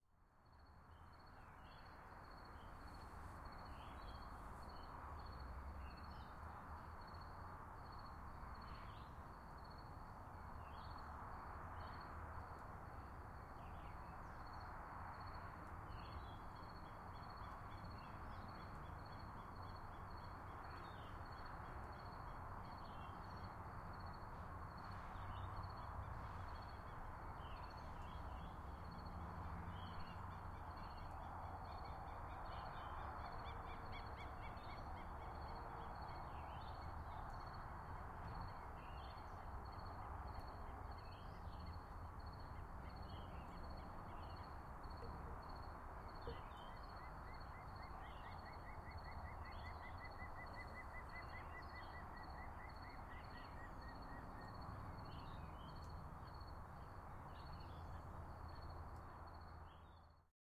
Twilight ambience recorded in the back yard at sunset. First addition to my Ambiences pack.